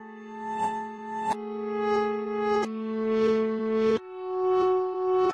EVM grand piano sounding nice
melodic, piano, reversed, reversed-piano